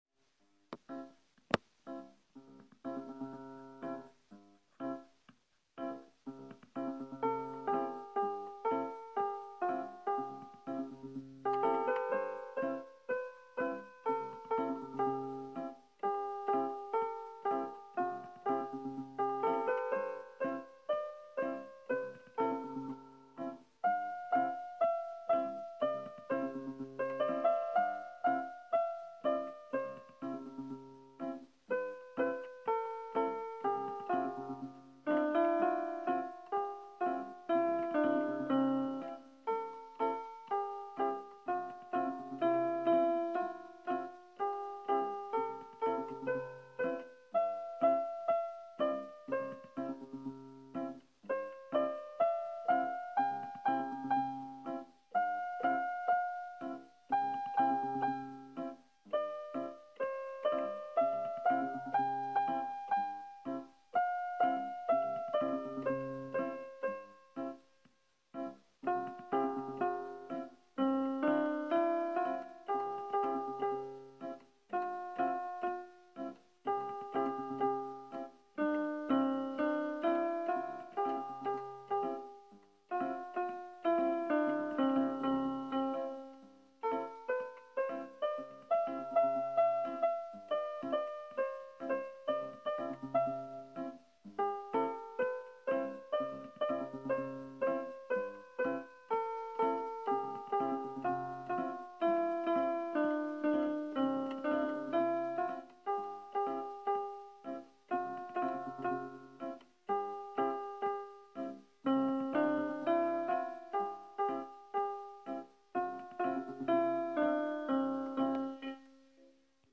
Esta melodía compuesta para demostrar un momento de alegría.